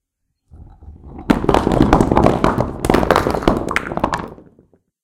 Falling Rock
A large rock wall falling down.
Stone, Rock, Falling